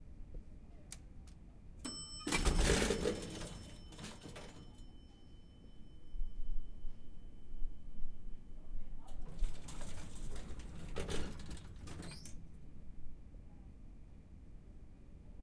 elevator in chicago building dinging, opening, and closing